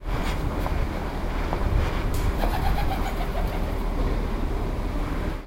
Dove going away and making noise, apparently frightened, in a small street in the old center of Genova